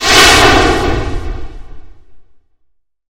air suddenly decompressing on a spaceship
sci-fi, space-station, scifi, airlock, decompression, spaceship, pressure